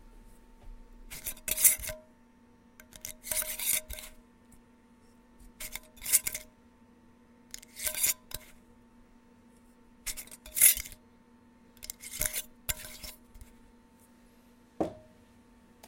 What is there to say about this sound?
Glass Jar!
Unscrewing and screwing on a lid to a mason jar. Recorded using an AKG Perception 120 in my home studio.